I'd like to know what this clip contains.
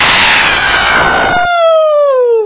A woman screaming.
female, woman, scream, 666moviescreams, pain